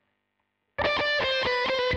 Guitar Lick
Here is a small lick using a Marshall Guv'nor into an Orange Tiny Terror, with a dynamic Wharfdale DM5000 about an inch away right in the centre of the cone.